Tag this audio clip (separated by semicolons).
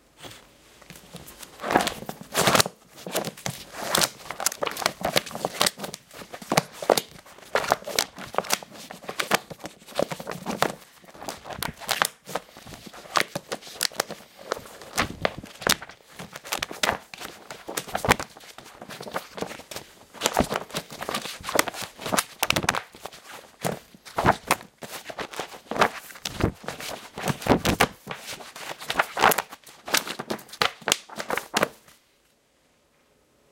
slap; book